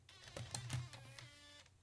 Eject CD from Laptop
cd, computer, drive, eject, H1, laptop, macbook, recording, stereo, zoom